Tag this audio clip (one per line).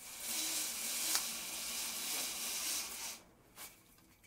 class
intermediate
sound